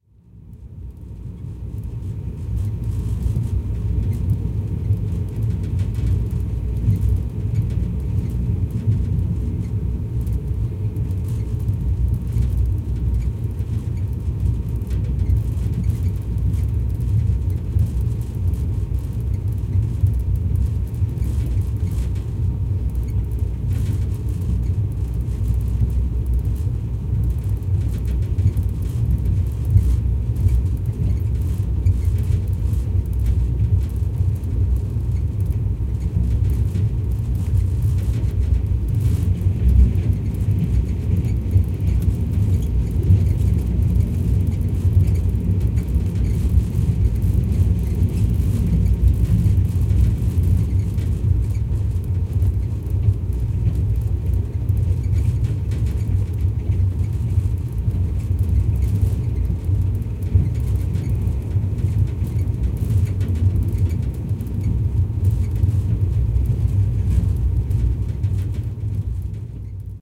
train somewhere in india